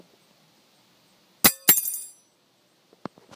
the sound of metal (butterknife, wrench, hammer, screwdriver, whatever you want?)being dropped on a concrete surface. recorded w/ my iphone 4s. enjoy!